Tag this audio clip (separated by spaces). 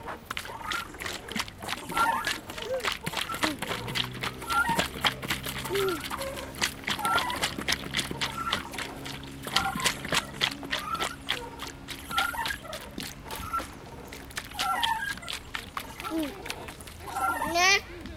bottle shaking swing